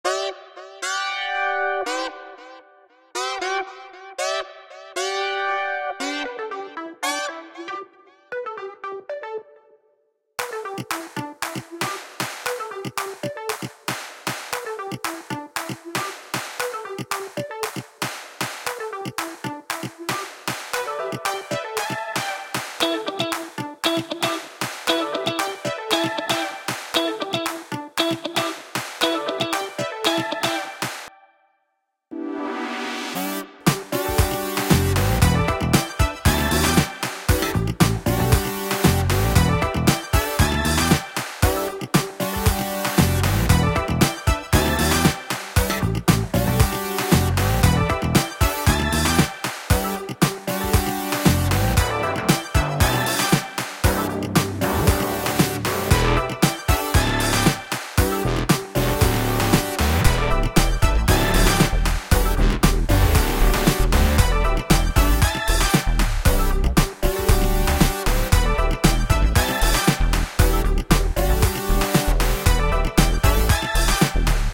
Funk Jams
A small funk-inspired fragment of a larger song, good for introductions. Made with Garageband
Electro, Funk, Kick